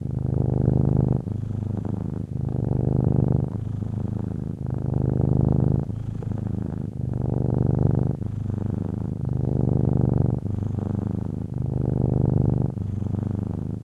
Cat Purring
My neighbor's young cat purrs loudly, right up against the Zoom H2. This sound can be looped seamlessly.
animals, cat, close, fuzzy, kitten, loop, purr, purring, purrs